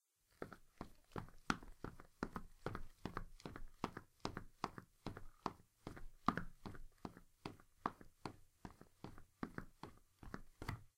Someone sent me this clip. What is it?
Some shoes running on a hard surface.